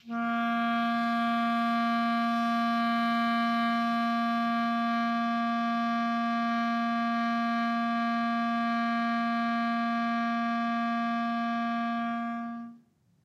One-shot from Versilian Studios Chamber Orchestra 2: Community Edition sampling project.
Instrument family: Woodwinds
Instrument: Clarinet
Articulation: long sustain
Note: A#3
Midi note: 58
Midi velocity (center): 42063
Room type: Large Auditorium
Microphone: 2x Rode NT1-A spaced pair, 1 Royer R-101 close, 2x SDC's XY Far
Performer: Dean Coutsouridis
midi-velocity-105, single-note, vsco-2, midi-note-58, multisample, long-sustain, woodwinds, asharp3, clarinet